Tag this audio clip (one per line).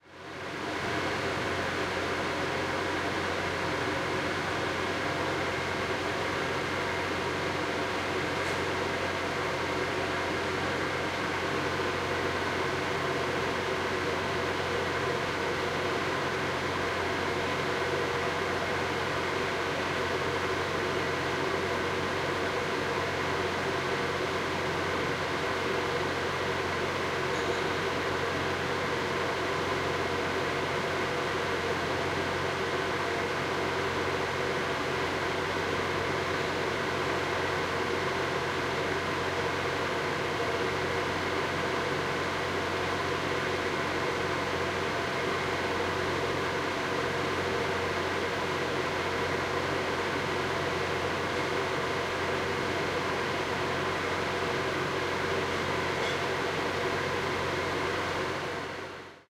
Poland
fan
noise
city-center
courtyard
poznan
fieldrecording